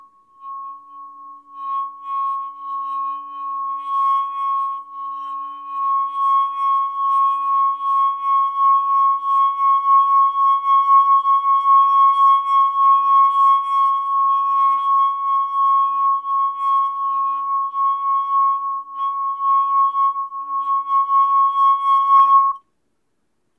Wineglass Ringing (Finger on rim)
Running finger along the rim of a wineglass, producing a C#. Starts with a slow build, and ends abruptly when the glass is silenced by grabbing it.
eerie, high-pitch, ring, wineglass